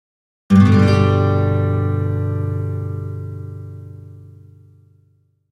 Acoustic Japan Guitar FG-151. G.
March 2018.
Recording equipment: Shure SM57 mic, Digilab SMP100 preamp, Roland QUAD-CAPTURE interface. Equalized by Logic Pro X.
Acoustic Japan Guitar FG-151.
Acoustic, chord, G